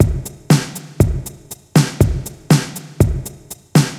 just a drum loop :) (created with FLstudio mobile)
synth, drum, drums